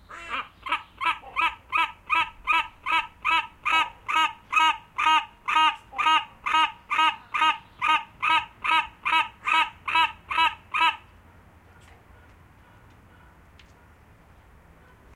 Black Casqued Hornbill 5
The call of the Black Casqued Hornbill. Strangely for a jungle bird it is reminiscent of the call of geese. Recorded at Le Jardin D'Oiseaux Tropicale in Provence.
bird, bird-call, bird-song, black-casqued-hornbill, field-recording, jungle, tropical-bird